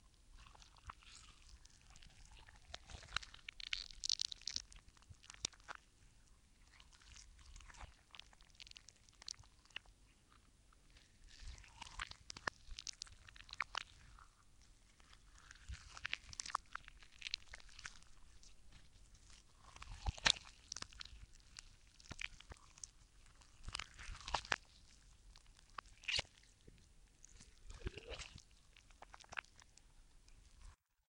Squeezing slimey in my hands, quiet studio recording.
gore, gross